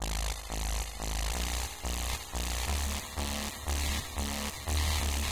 90 Nuclear Atomik Pad 02
standard lofi hiphop pad